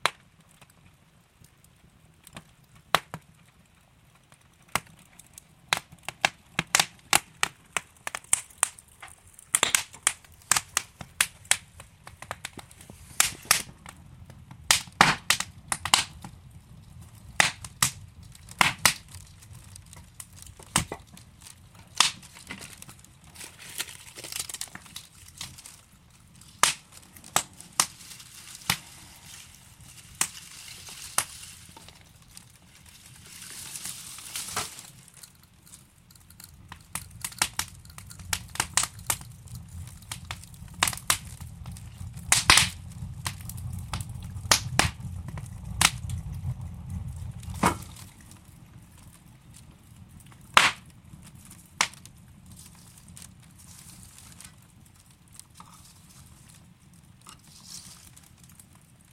Sounds recorded from a fireplace, lots of crackings!
Bye
F
cracking-wood, fireplace